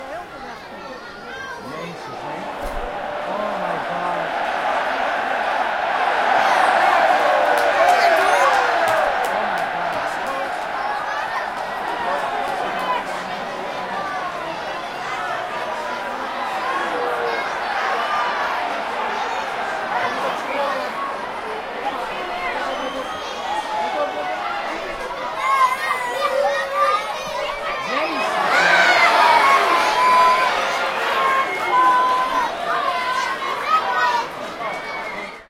Soccer Stadium 06
Field-recording of a Dutch soccermatch.
Recorded in the Cambuur Stadium in Leeuwarden Netherlands.